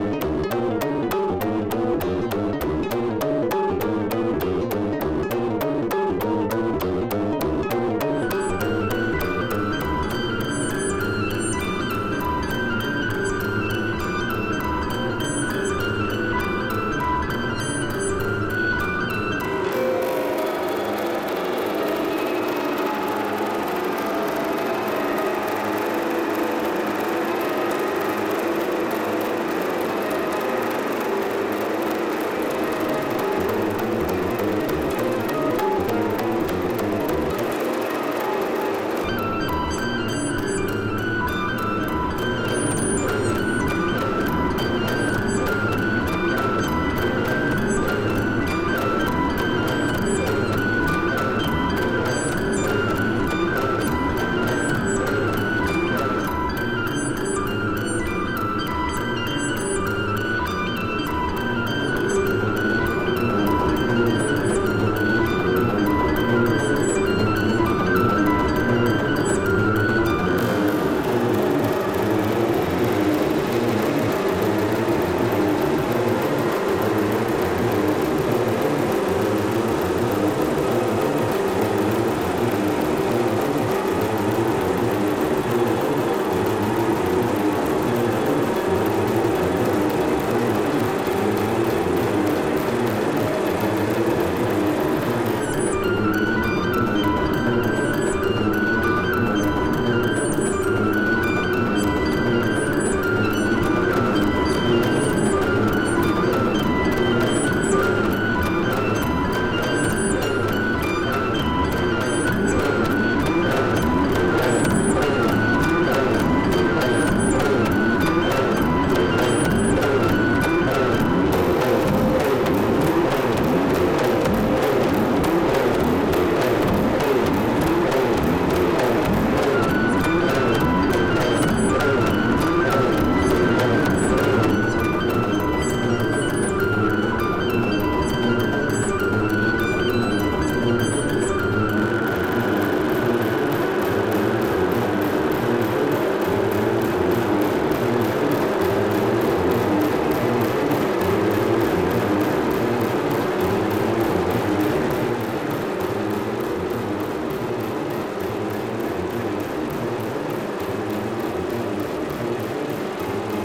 Driving rhythm created by dpo mapped through QMMG in lo-pass mode. Special effects through Mimeophon Erbe-Verb and Echophon. X-PAN shifts the pan (before the sh-t hits it). Sock it to me... and enjoy!